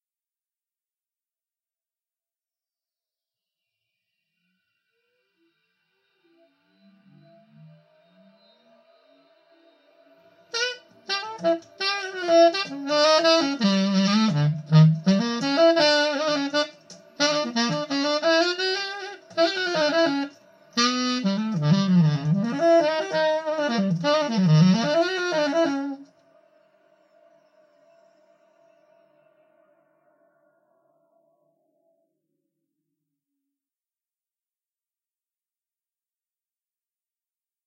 Sonido de saxofón tocado dentro de una habitación.
El sonido está generado a partir de un sonido limpio de saxofón convolucionado con la respuesta impulsional de una habitación particular.